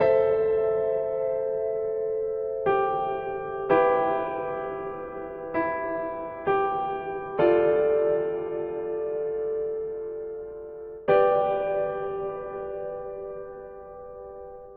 mixed chord progression
A small chord progression created on flstudio using piano-one and a custom reverb of my own
ambient, chill-out, melodic, Piano